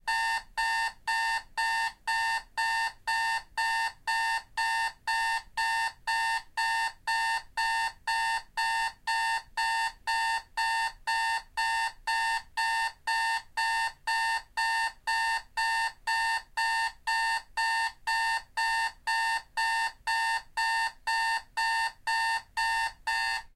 Alarm Clock 1
A recording of my Alarm Clock going off. I used my H4N Zoom Recorder to catch this repetitive, loud, and annoying sound that is sure to wake you up in the morning.
Alarm, Annoying, Clock, Loud, Morning, Repetition, Repetitive, Sharp, Wake, Waking